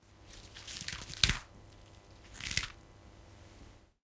A lot of sound design effect sounds, like for breaking bones and stuff, are made from 'vegetable' recordings. Two Behringer B-1 mics -> 35% panning.
pulse, bones